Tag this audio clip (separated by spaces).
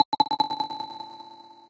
click glass echo